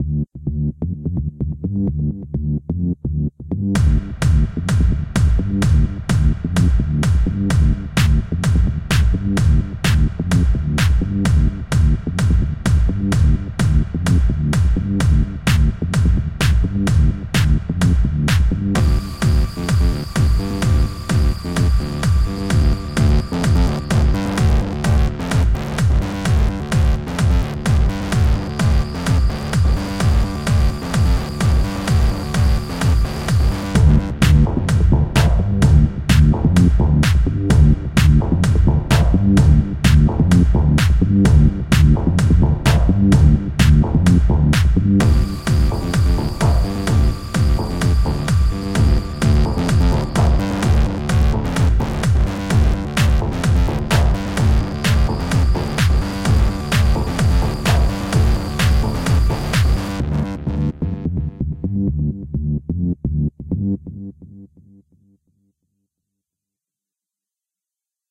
tea-tyme!
minimalist house track I made in renoise
house, dance, electronic, minimal, edm, sequel, trance, synth, rave, basic, loop, acid, bass, yowuddup, techno, atmosphere, electro, ambient, club, music